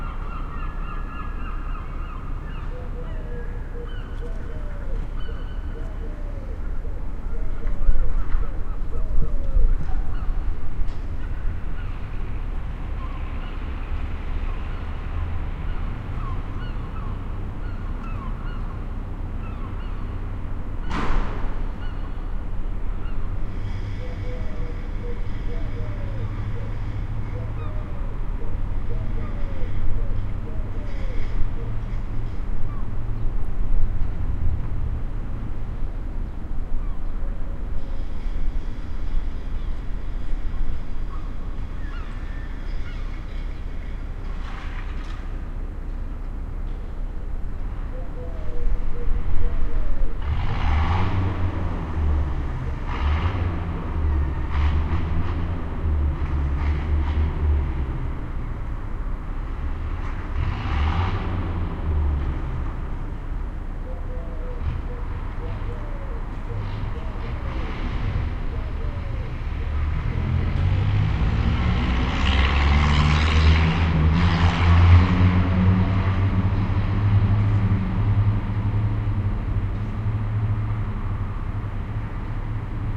Morning in Aarhus city center
Aarhus is the second-largest city in Denmark, a seaside and university town. This track was recorded early in the morning in the city center, using two WL183 microphones from Shure, a FEL preamp into the R-09HR recorder. I tried to record some seagulls, but then the cleaning of the pavements started.
aarhus, city, denmark, field-recording, morning, town